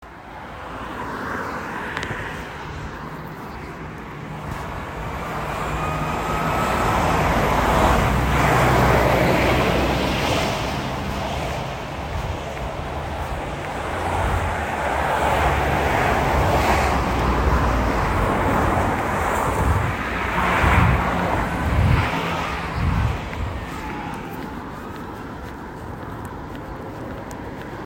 Sounds of a busy road